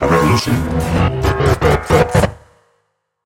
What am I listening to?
Another wee transformer sound
machine, Transformer, design, abstract, electric, robot, future, sfx